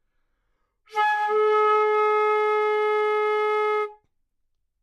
Flute - Gsharp4 - bad-attack
Part of the Good-sounds dataset of monophonic instrumental sounds.
instrument::flute
note::Gsharp
octave::4
midi note::56
good-sounds-id::3067
Intentionally played as an example of bad-attack
flute, good-sounds, Gsharp4, multisample, neumann-U87, single-note